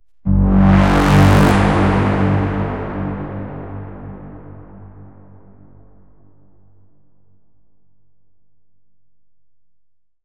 Entirely made with a synth and post-processing fx.
cinematic, sfx, sound-design, scary, dramatic